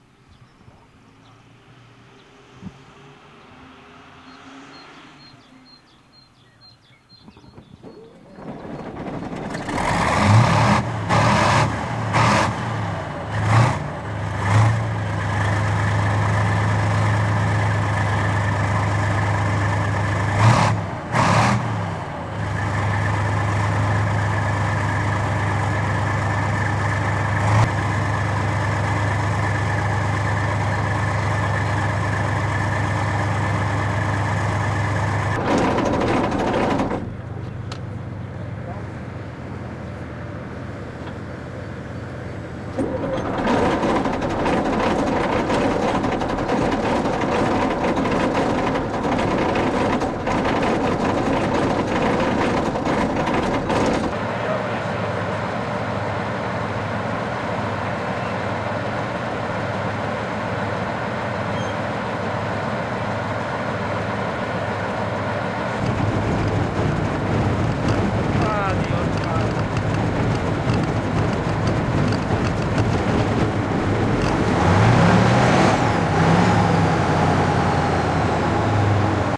silnik czołgu / tank engine
army, difficult, effect, engine, field-recording, fx, machine, ride, sfx, sound, soundeffect, special, tank, terrain, trip